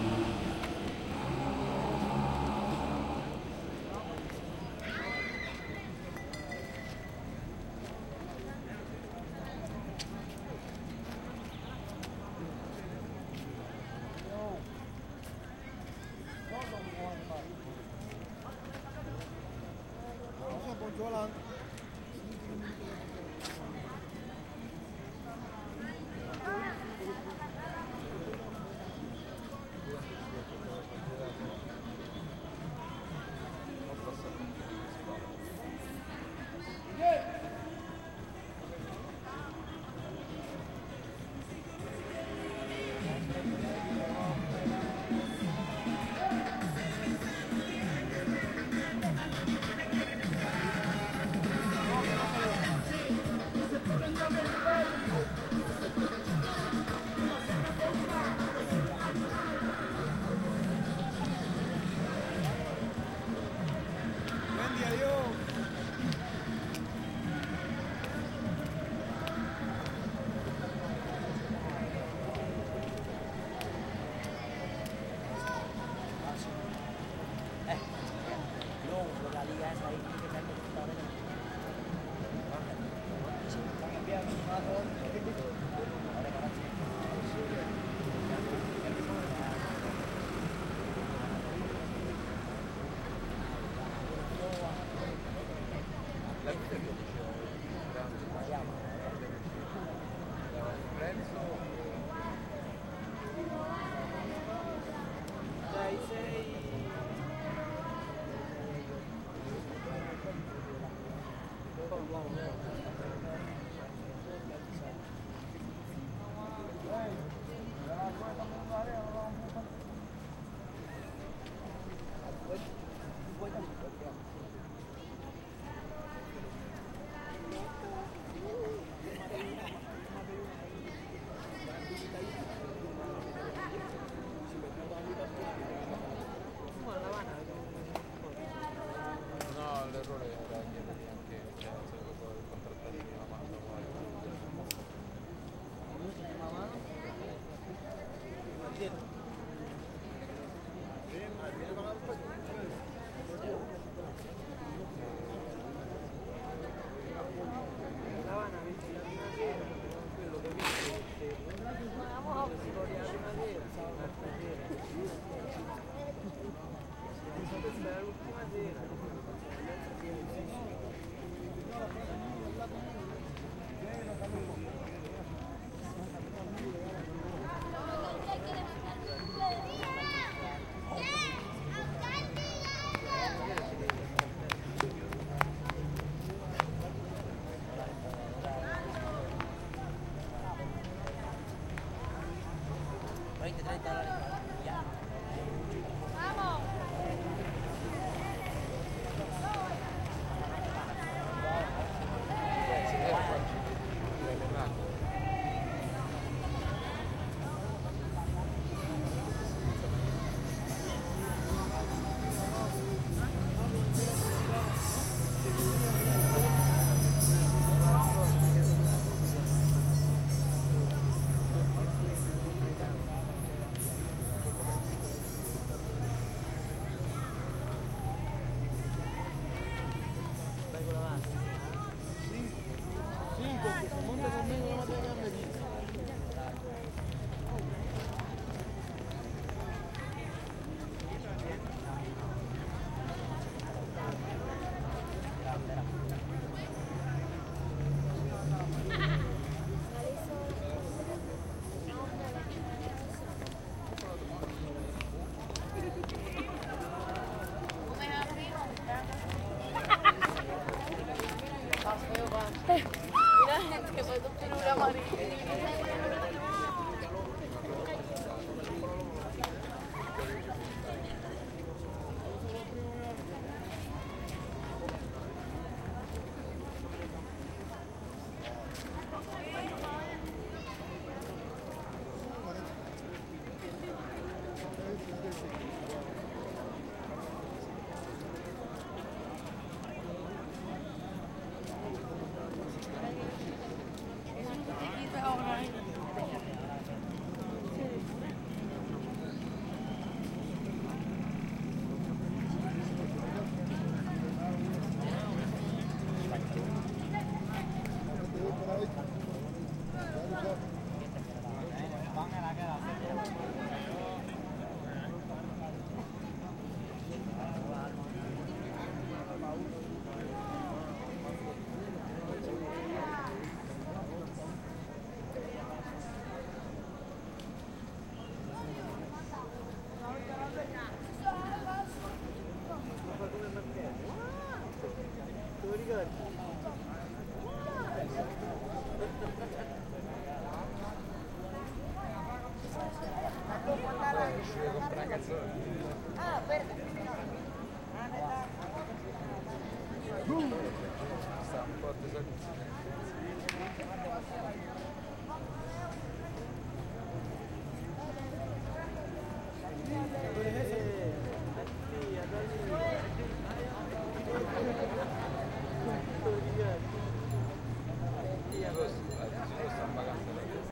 park square people some close voices spanish1 and cars with music Havana, Cuba 2008
park square people some close voices spanish and cars with music Havana, Cuba 2008